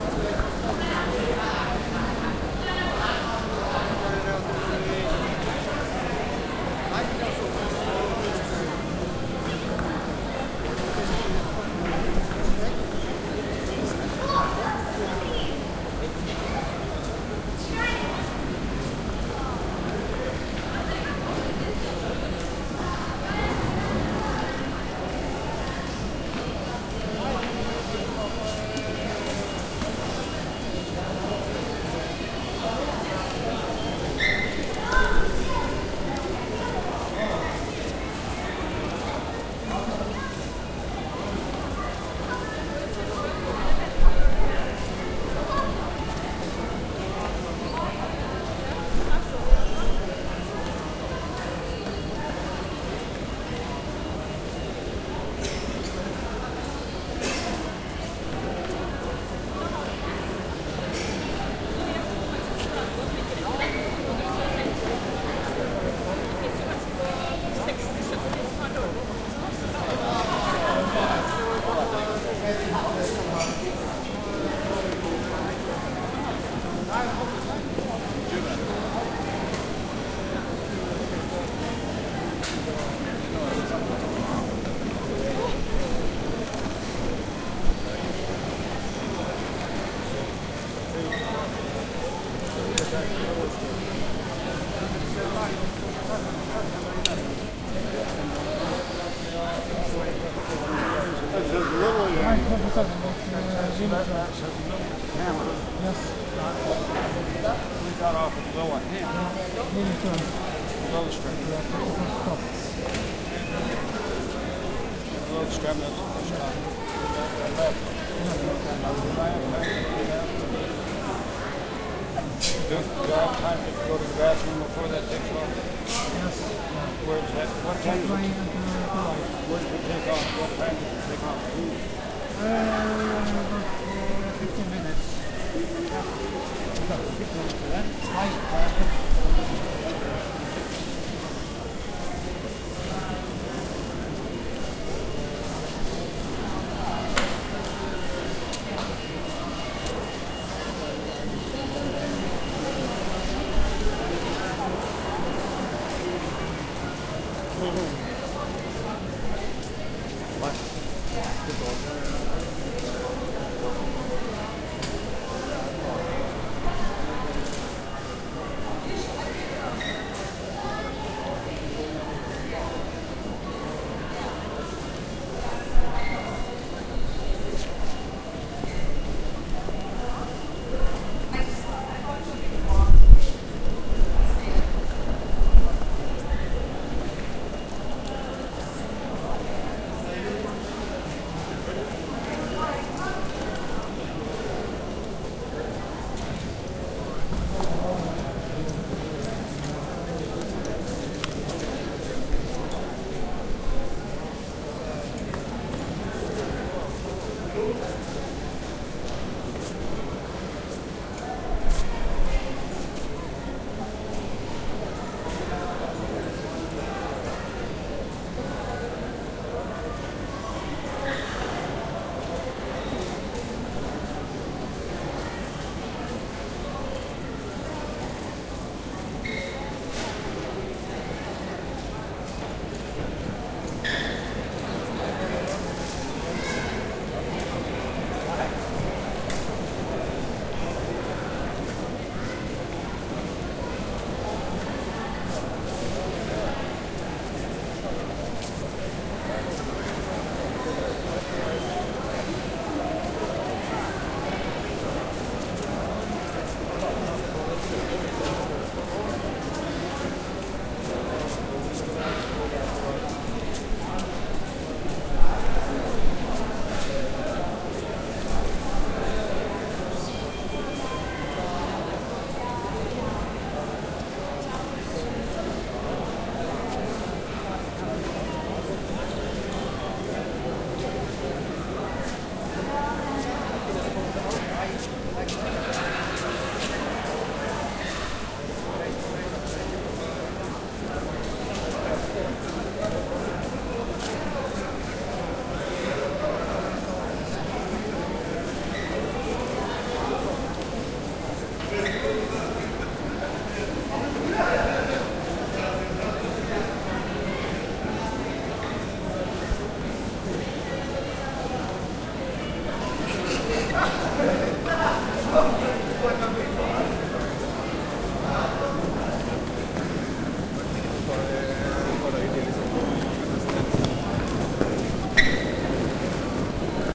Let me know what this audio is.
Field recording from Oslo Central Train station 22nd June 2008. Using Zoom H4 recorder with medium gain. Trying to get recordings of Norwegian speech.